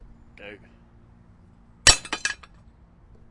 Axe Drop 1 Front
Dropping a heavy metal object to replicate the sound of an axe being dropped.
Axe, Clang, Clank, Drop, Metal